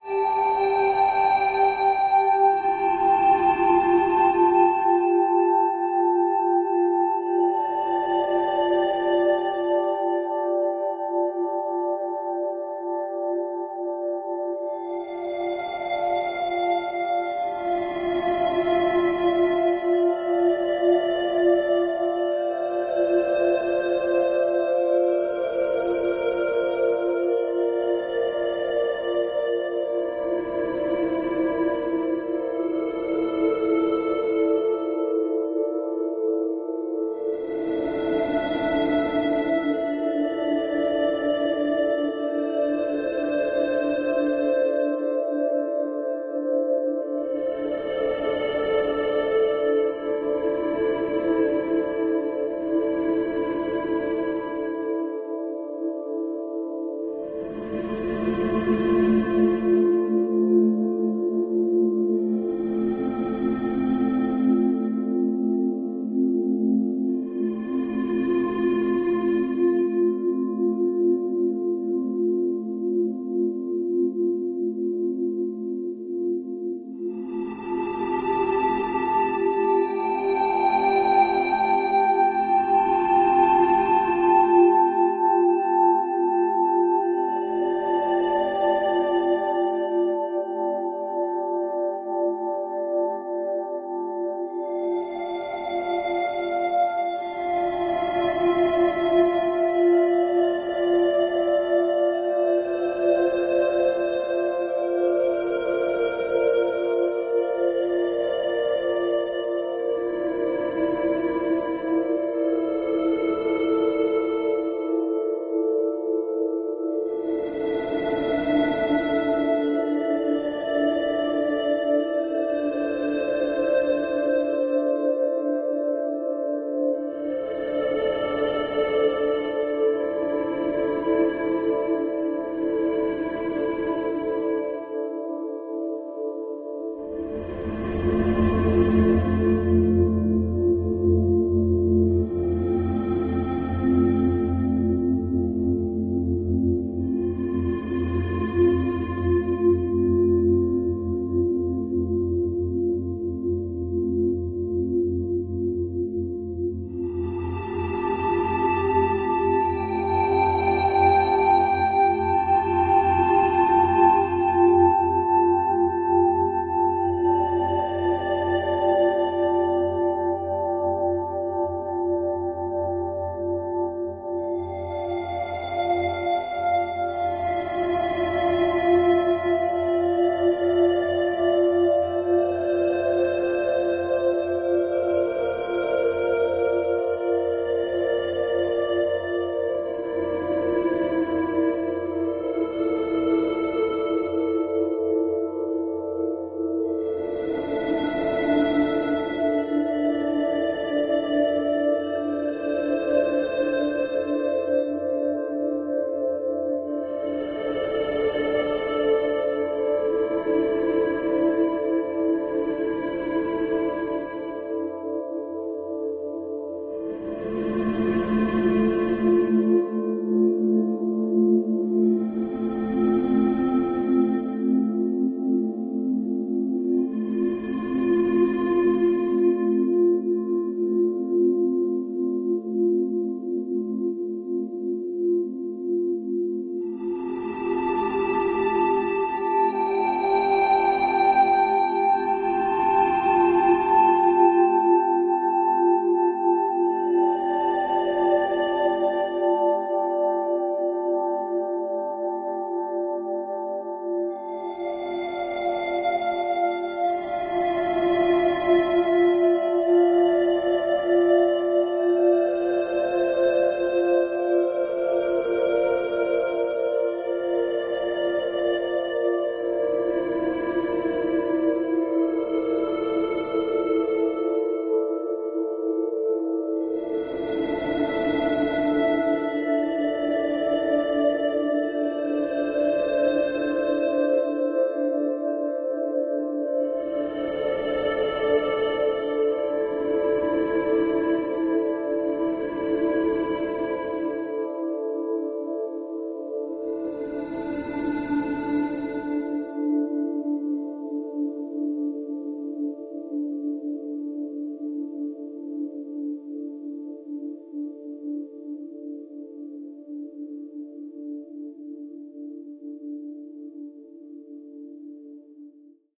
Pablo Escobar's Reflections / WW2
Pablo Escobar's Reflections
Insights into Escobar's thoughts, emotions, his inner world. Ride the highs of freedom, sink into sadness, relive untold memories, and get lost in a haunting beauty that defies description. This is the epic echo of a life lived on the edge — Larger Than Life.
WW2
This soundscape works also as a WW2 theme, when someone says farewell and is supposed to never come back : Farewell, Arrivederci, Au Revoir, Tschüss, Adios
adios, arrivederci, au, escobar, farewell, goodbye, love, movie, pablo, revoir, tschuss, war, world, world-war, ww2